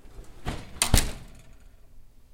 Oven Door close 2 incheswav
closing an oven